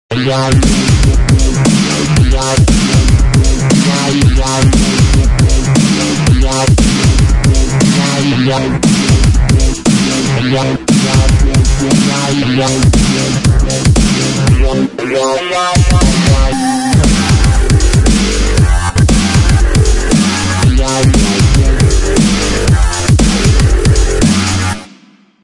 Likrakai Template 02
Here's a few loops from my newest track Likrakai! It will get filthier and filthier....i promise ;)
bass, drum, filthy, hat, kick, loop, mastering, snare, synth